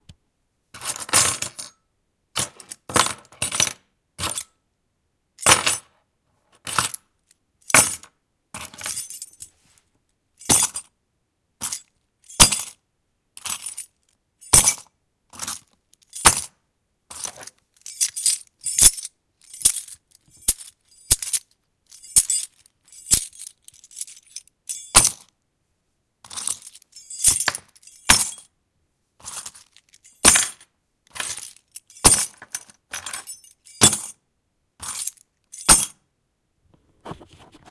metal keys
A bunch of keys, with some big iron ones included, being grabbed and thrown on a counter. First plain counter and then with a newspaper in between.